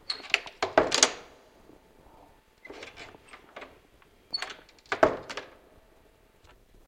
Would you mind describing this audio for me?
door opened and closes